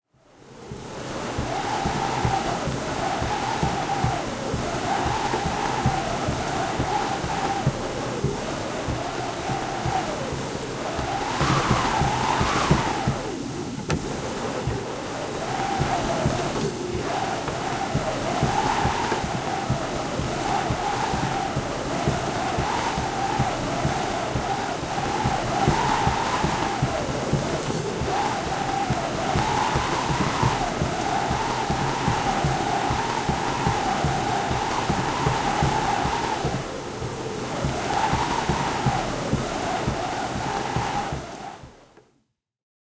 Old wind machine // Vanha tuulikone
Aeoliphone, manual, sound of wind when rotating a wooden cylinder against canvas causing friction, interior.
Tuulen ääntä tuulikoneella. Puista sylinteriä pyöritetään kangasta vasten, mistä syntyy kitkaa ja hankausta. Myös puisen telineen ääntä.
Tämä tuulikone on hankittu Yleen jo yhtiön varhaisvuosina. Markus Rautio oli hankkeessa aktiivinen toimija. Laitteessa on puinen kehikko, rimoista tehty sylinteri, joka pyöritettäessä hankaa ympäröivää kangasta. Tästä syntyy tuulta kuvaava ääni. Laite on lahjoitettu kuluneena Radio ja TV-museo Mastolaan.
Place/paikka: Suomi / Finland / Helsinki / Yle
Date/Aika: 2017
Instrument, Aeoliphone, Wind-machine, Tuuli, Wind, Tehosteet, Tuulikone, Kitka, Friction-idiophone, Yle, Friction, Suomi, Yleisradio, Soundfx, Hankaus, Finnish-Broadcasting-Company, Instrumentti